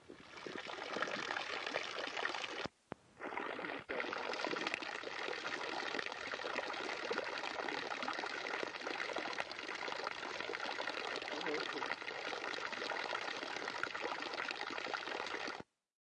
Water pouring out of fountain
bubble, fountain, Mr, pouring-water, Sea, water, wawes